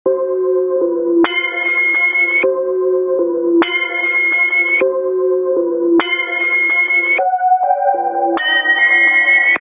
Scary Warehouse
A Scary Ware-House Type sound recorded at 101bom.
loud, strings